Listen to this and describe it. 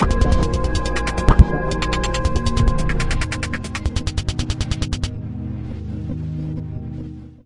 Caden 2 drums
Some quick orchestra peices I did I broke it down peice
by piece just add a romantic pad and there you go, or build them and
then make the rest of the symphony with some voices and some beatz..... I miss heroin....... Bad for you....... Hope you like them........ They are Russian.
melody, orchestra, space, happiness, love, ambient